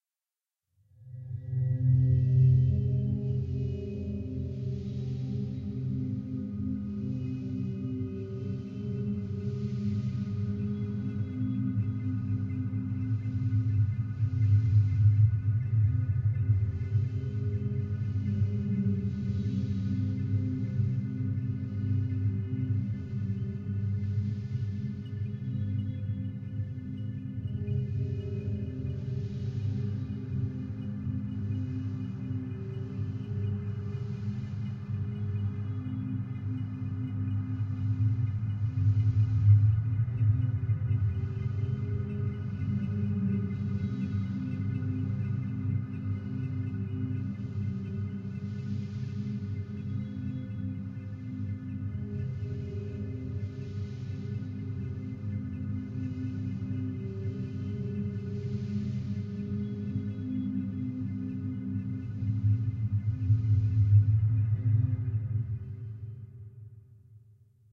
cine background10
spooky, deep, music, soundscape, space, film, ambience, ambient, mood, sci-fi, pad, scary, background, movie, dark, hollywood, atmosphere, thiller, suspense, dramatic, drone, thrill, drama, trailer, horror, cinematic, background-sound